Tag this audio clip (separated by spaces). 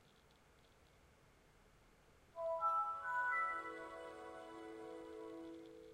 computer electronic machine aip09